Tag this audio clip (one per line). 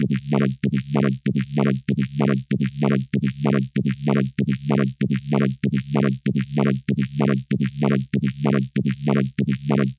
bandstop
bass
electro
electronic
filter
filtered
loop
processed
saw
synth